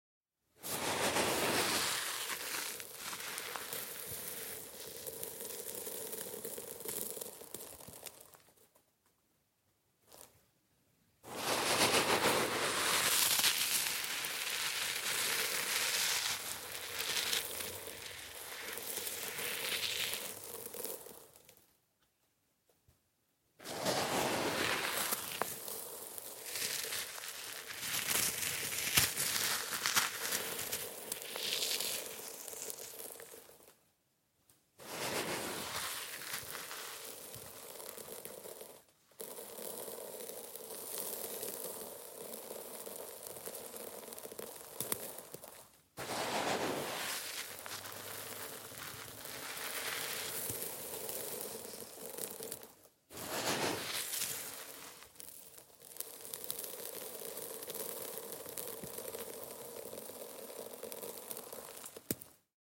SAND POUR
Grabbing sand and pourring it on a pile of sand. Close perspective
pour, sable, pouring, sand, texture, grain, grabbing